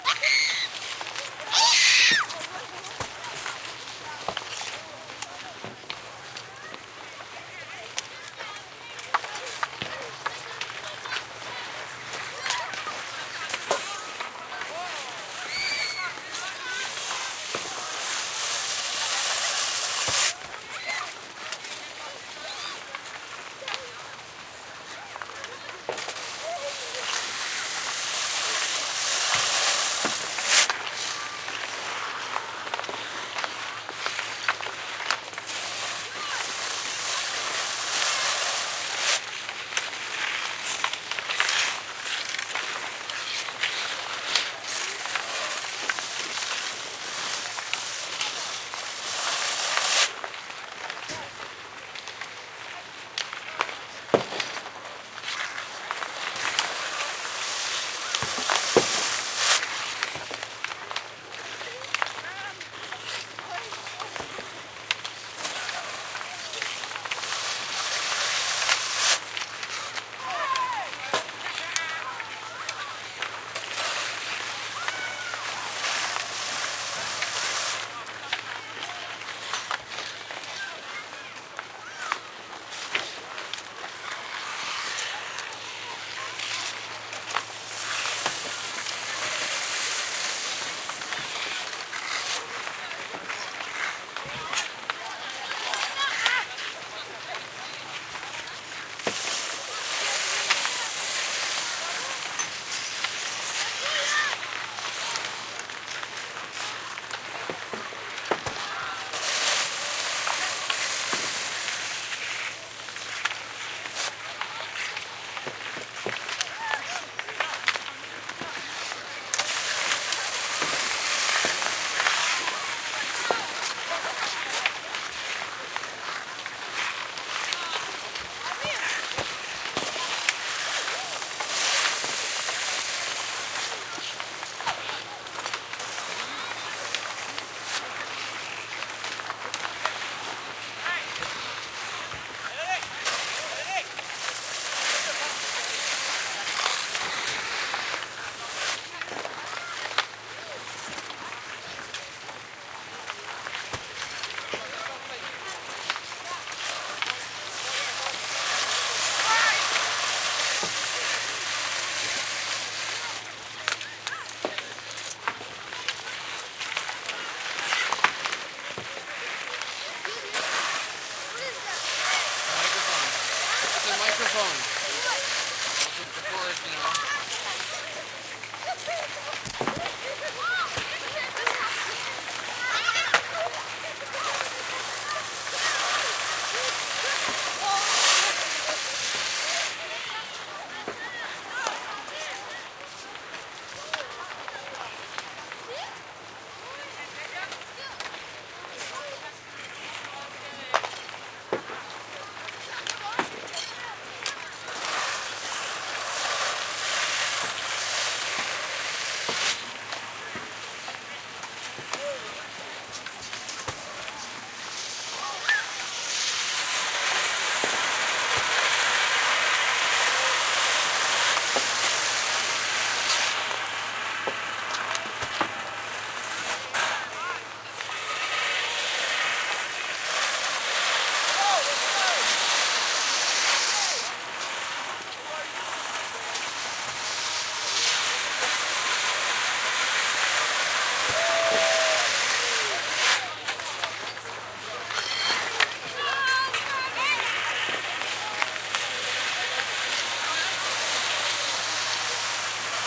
skating rink outdoor kids, teenagers nearby2 busier +kid pushing shovel on ice intermittently
teenagers, rink, skating, push, shovel, kids, outdoor